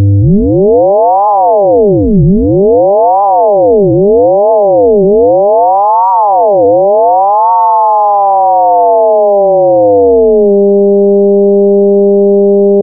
FM sine oscillate
FM sine sweeps in both directions.
noise, sine, synthesized, fm